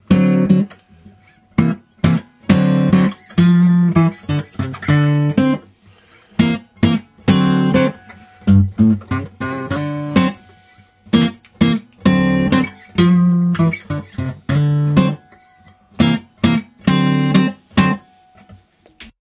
Dmajor jazzy2 100bpm
Part of the guitarholica pack.
Jazz, rock, pop ... you decide !